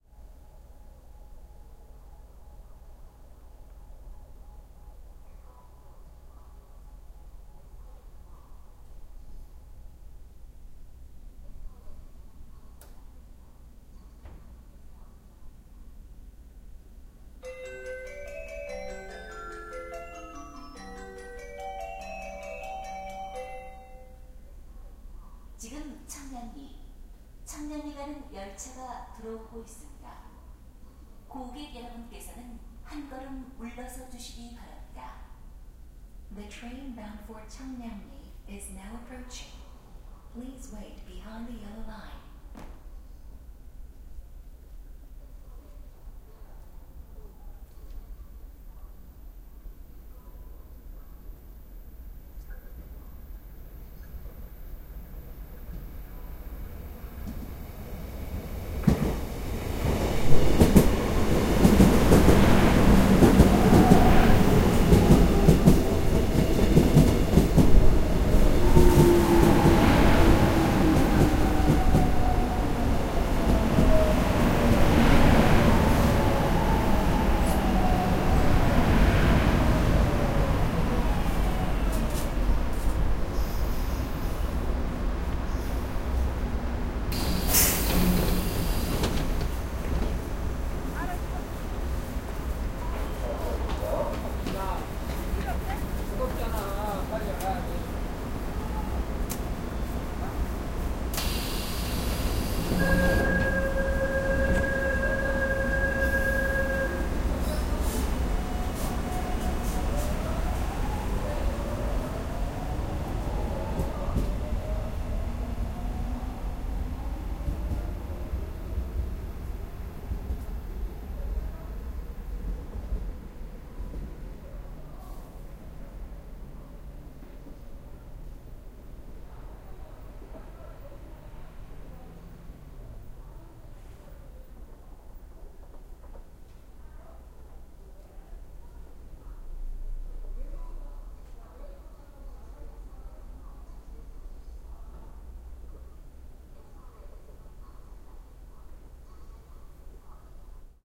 Waiting for the metro in the station, ambulance or police in the background. Metro coming to the station. Speaker announcing metro coming in Korean and English. Speaker information in the background.
20120122